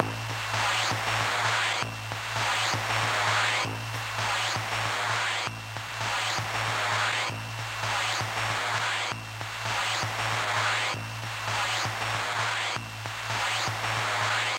Electric sounding industrial loop.
electric, loop, noise, machine, industrial, machinery, mechanical, robot, factory, robotic